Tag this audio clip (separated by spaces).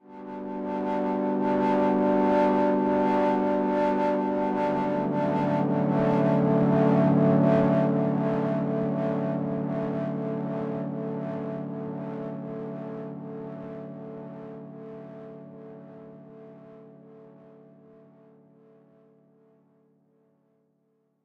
ambient atmosphere digital sound-design synthesizer